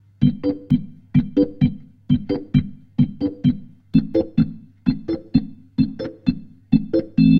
Zulu 65 G BUBBLE 01
Reggae rasta Roots
Reggae, Roots, rasta